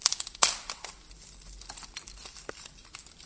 Snapping sticks and branches 03
Snapping sticks and branches
Digital Recorder